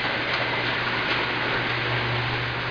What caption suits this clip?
I originally recorded this at a FedEx workshop with my Dad in April 2011. Though it's actually a sliding garage door, it can be really good for being used as a tank tread.